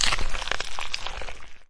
A quick edit and rendered sound effect made from manipulating chicken bones on a high gain. I think of rabid dogs nibbling on the corpses of unfortunate men or a maggot-infested corpse way past it's necromancy date.